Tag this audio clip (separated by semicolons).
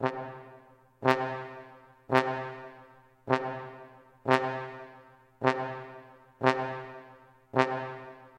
trombone; staccato; brass; horn